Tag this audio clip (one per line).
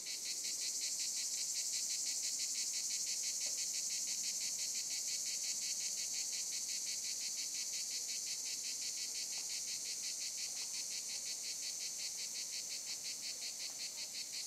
cicada
field-recording
summer
cicadas
nature